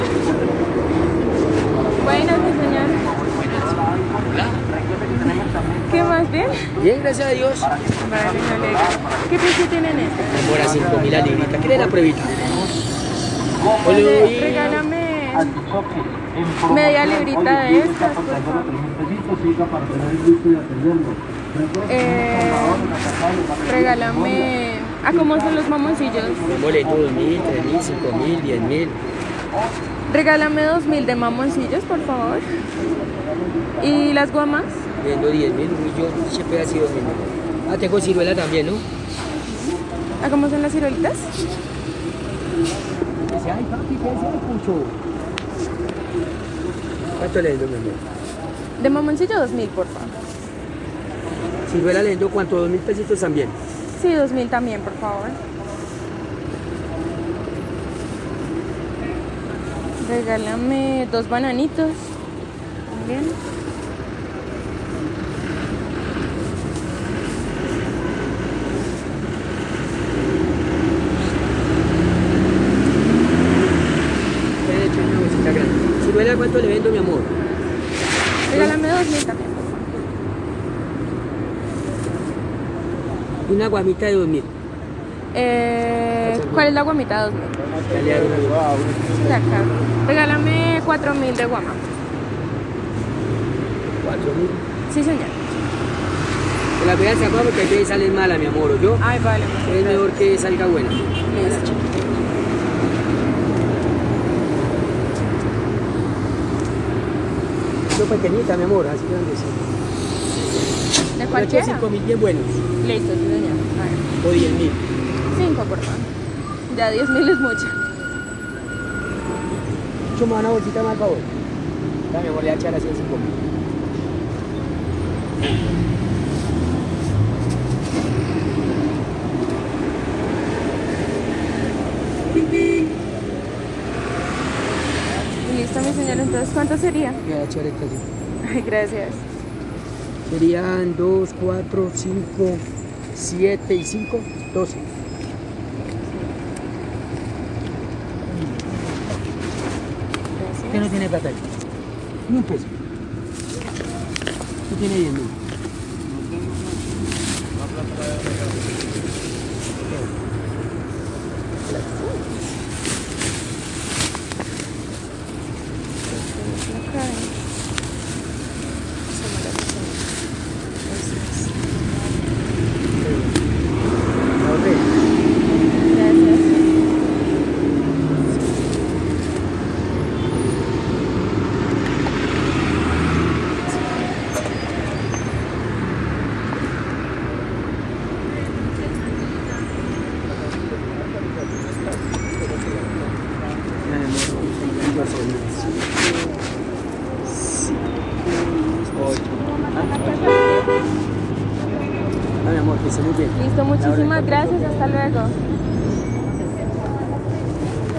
Patrimonio Museo Nacional-Toma única-Frutas-Evelyn Robayo-10:03:20
Toma única desde la entrada del museo nacional, hasta un puesto ambulante de frutas en Bogotá. Para la realización de esta toma se utilizó un teléfono celular Samsung Galaxy A10. Este trabajo fue realizado dentro del marco de la clase de patrimonio del programa de música, facultad de artes de la Universidad Antonio Nariño 2020 I. Este grupo está conformado por los estudiantes Natalia Niño, Evelyn Robayo, Daniel Castro, David Cárdenas y el profesor David Carrascal.